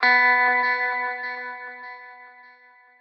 Lead Hit B
These sounds are samples taken from our 'Music Based on Final Fantasy' album which will be released on 25th April 2017.
Music-Based-on-Final-Fantasy, Lead, Sample, Hit, Synth, B